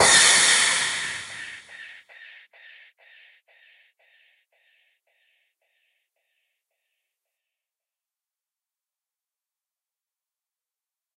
some crash with a squeel behind it. used in house and tech.